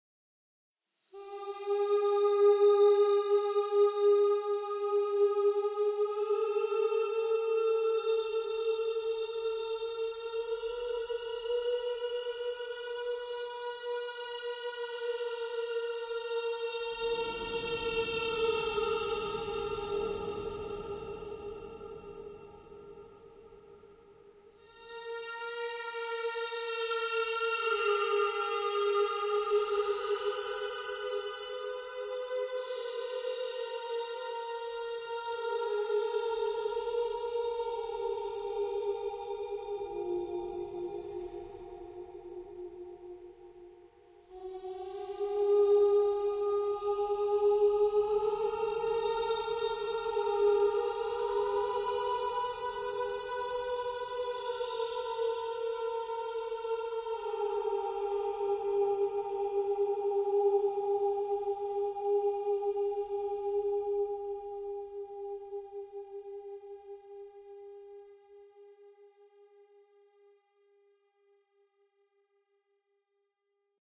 Heavily modified my vocals to create something atmospheric. Enjoy!
(Unmastered)
Calm, Serene, Soothing, Soundscape